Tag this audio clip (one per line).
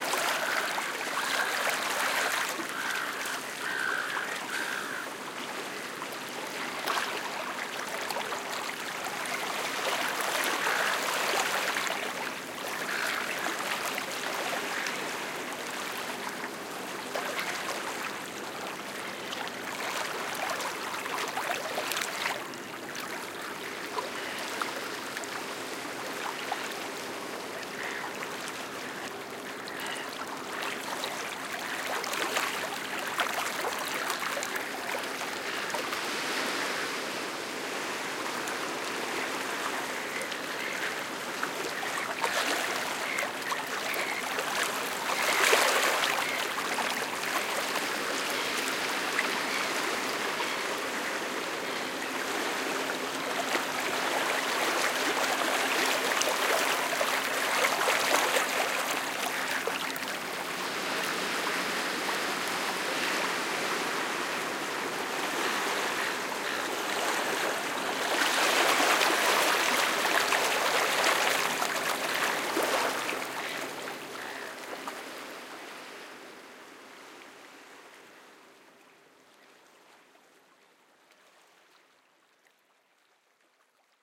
beach
environmental-sounds-research
field-recording
gentle
gulls
lapping
ocean
sea-birds
seagull
sea-gulls
shore
stereo
water
waves
wet